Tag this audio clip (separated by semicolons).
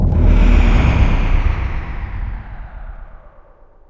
animal; cat